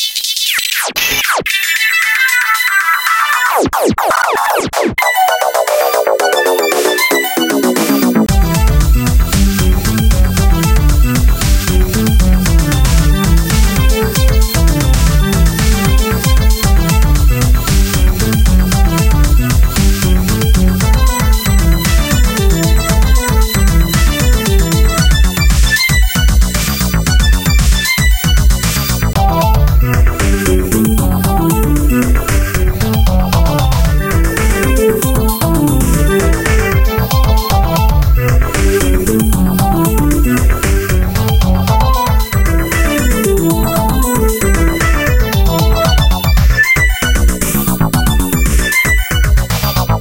EDM Electro-Techno Loop at 115 BPM. FL Studio, Gross Beat and Audacity.
115, Loop, Techno, Electronic, BPM, EDM